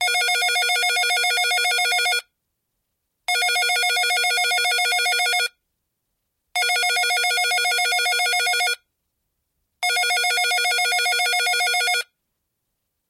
FX LuMu cellphone ringtone Huawei Y6 fx business T03
Cellphone ringtone
Model: Huawei Y6
Recorded in studio with Sennheiser MKH416 through Sound Devices 722
Check out the whole pack!